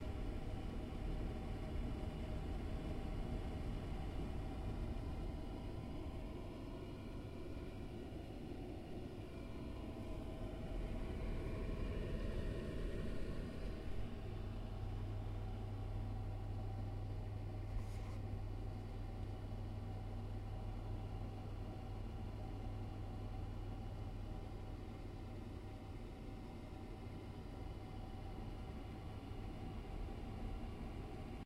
A recording of a backroom with the extractor fans running, the audio is low in pitch and can be used in instances like machinery rooms, all of my sounds come uncompressed or processed. this means there may be unwanted background noise/ sound that you can remove or not at your will.
Equipment Used:
Tascam DR40 W/ Built In Stereo Microphone
File Information:
Stereo